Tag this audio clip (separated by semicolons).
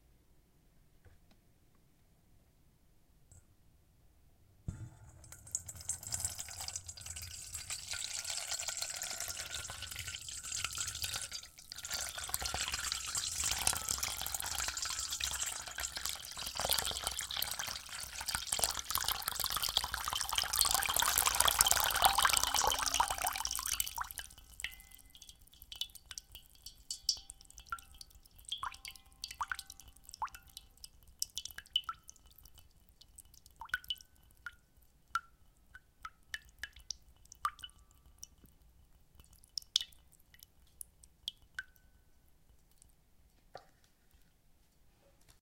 AKGC1000s; bowl; sound-experiment